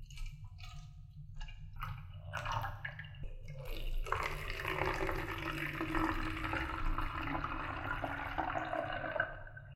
Sink emptying
water going down a sink drain and gargling, made from water being poured out of and into a bottle